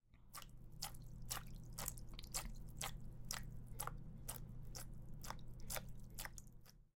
milking a cow
cow, farm